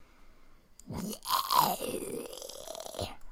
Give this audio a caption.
Part of the sounds being used in The Lingering video game coming soon to PC. Created using Audacity and raw voice recording.